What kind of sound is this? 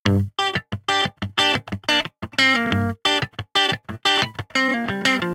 Funky Electric Guitar Sample 2 - 90 BPM
Recorded with Gibson Les Paul using P90 pickups into Ableton with minor processing.
guitar, funk, rock, sample, electric